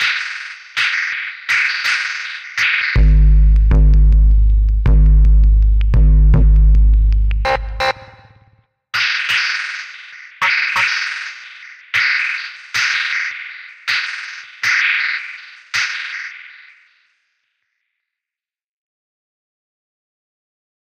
beat46( 80bpm)
drum-loop, rubbish, beat, rhythm